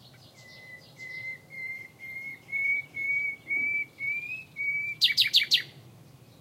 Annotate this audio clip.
20060419.nightingale.whistle
the characteristic 'whistling' that nightingales often make / el caracteristico silbido que hacen a menudo los ruiseñores
birds, field-recording, nature, nightingale, spring